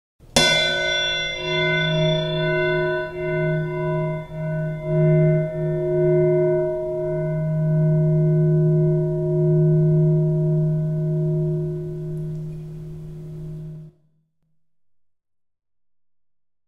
Project Orig
Took a 7 1/2 saw blade and taped it while recording, cut out background noise, and adjusted the dynamics. Hope someone has some use for this.